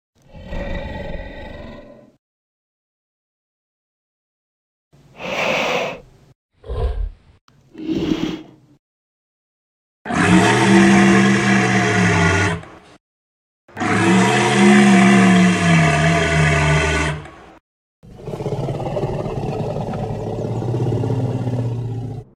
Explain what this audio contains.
sounds i created for the sound of a jurassic park dinosaur. most of the sounds are recorded from a walrus in a sea life centre.